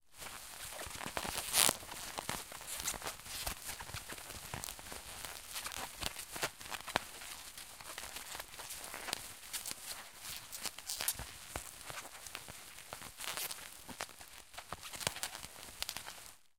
plastic - bubble wrap - handling 02
squeezing and handling a wad of bubble wrap.